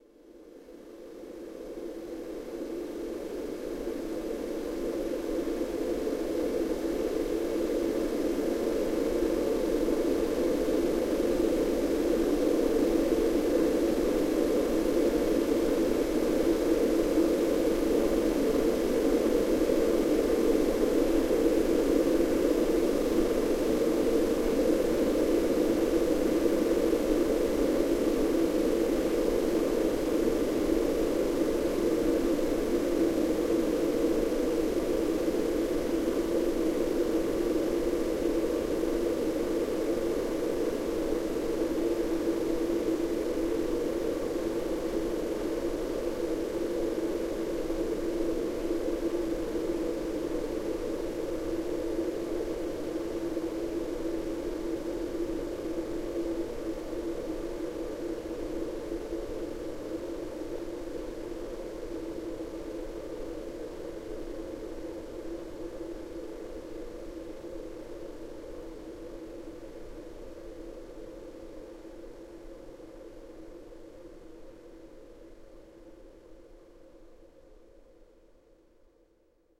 Gust of Wind 2
Processed wind noise.
I slowed it down in Audacity.